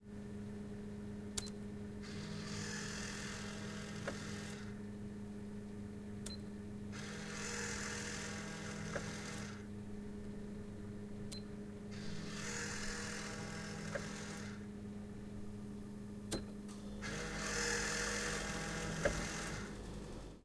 Vending Machines - Food Machine Direct
Food machine operating. Audible hum and buzz.